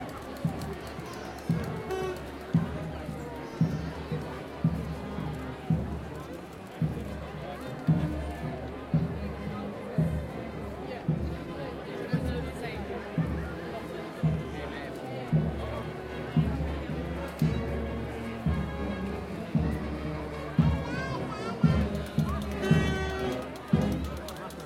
Distant marching band
Crowd noise during a parade with a marching band drumming in the distance.